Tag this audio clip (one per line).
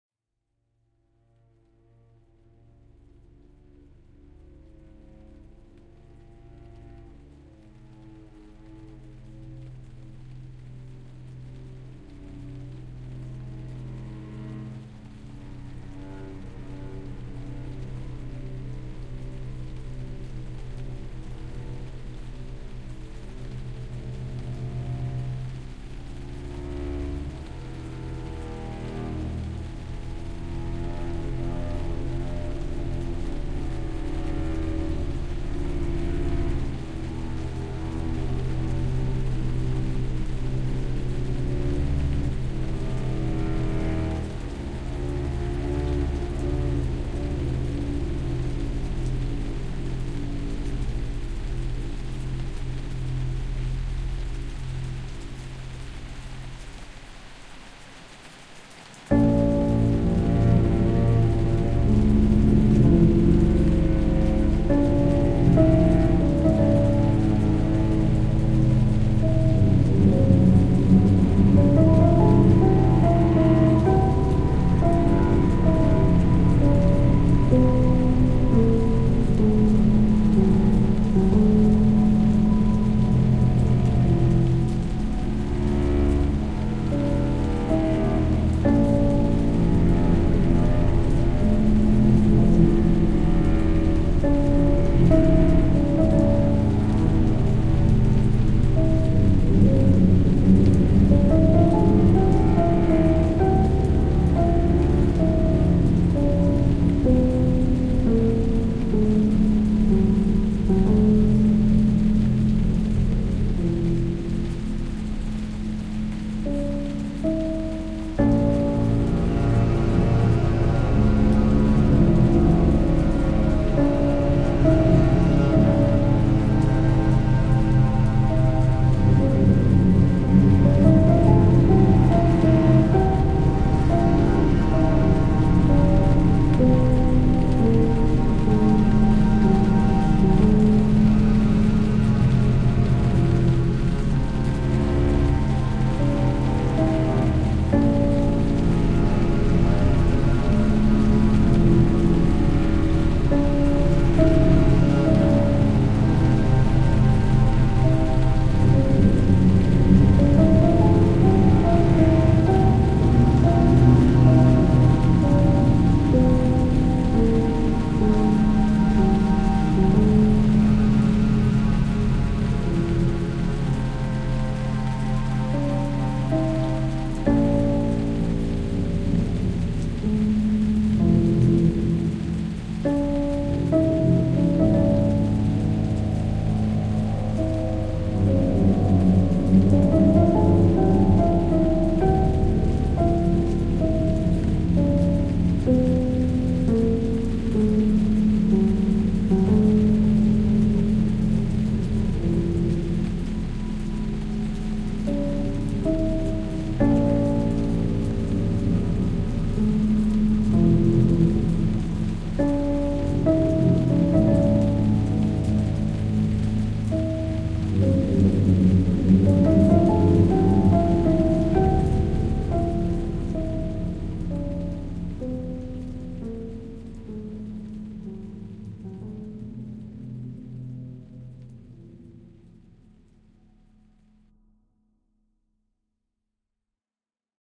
ambiance
atmospheric
cello
dark
dark-theme
emotional
rain
soundtrack
strings
violin
war
world-war